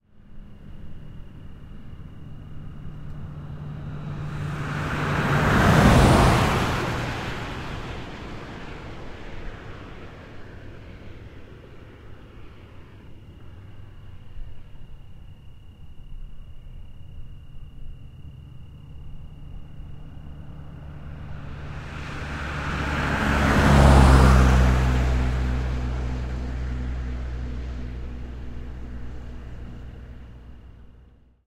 Two cars pass by the microphone with gap between them, speeding away from Los Angeles late at night down Santiago Canyon Road. Recorded on 12 August 2007 with a Zoom H4.